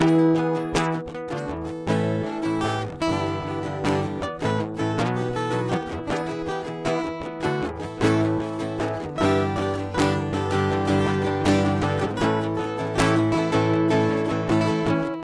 All You Wanted loop
Recorded using Digitech JamMan Solo looping pedal. Electric/acoustic guitar and keyboard both plugged in. Layed down base track and then just played around, layering different tones.
Faster pace with driving melody. Recorded using looping pedal and guitar.
melody, original, guitars, guitar, loop